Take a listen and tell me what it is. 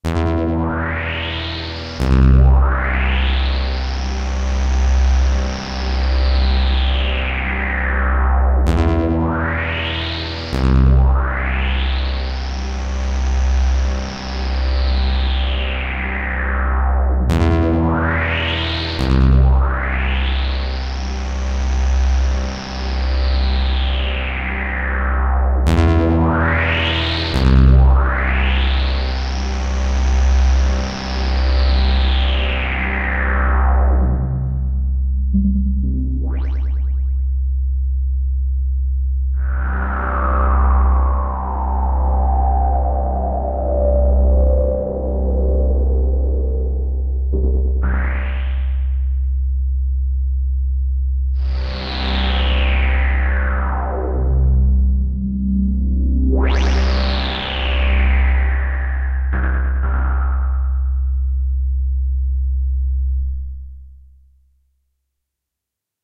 An assortment of strange loopable elements for making weird music. This is a synth phrase, forget which one, I plan on using it for a horror theme, maybe next halloween.
horror, phrase, synth, element